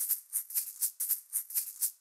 eggshaker loop edited in reaper made with eggshaker & zoom h1